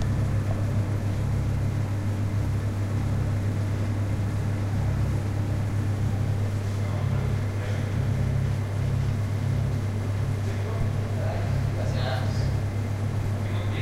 coffe machine motor
This sound is refered to the noise produced by the motor of a coffe machine.
campus-upf, coffe, machine, motor, noise